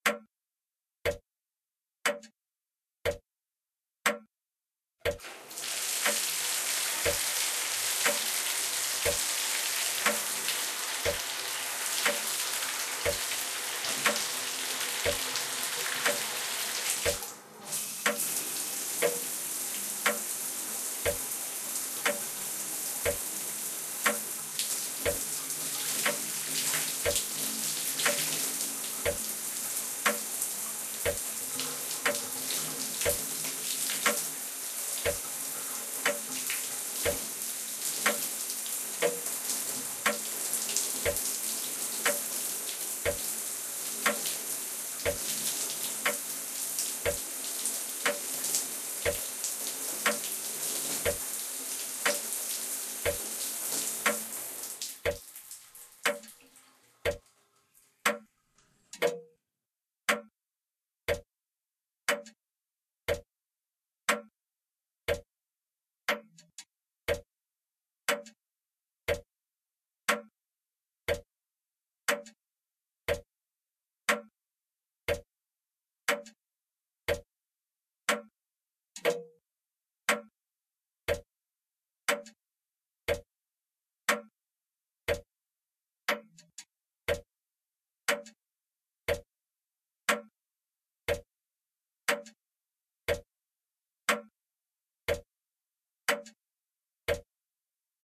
A loop of a grandfather clock with the sound of a shower in the background